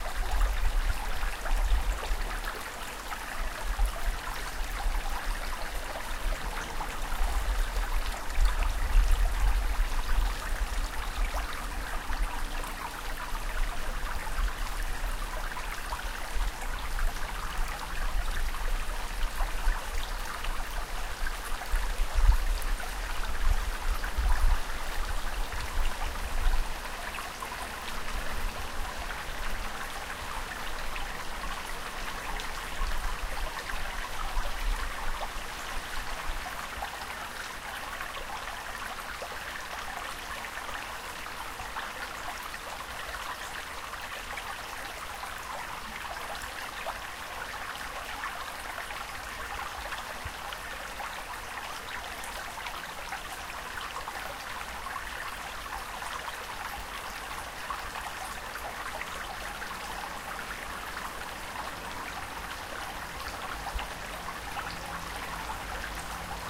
River - Running water 1
River sound recordet with Zoom H1.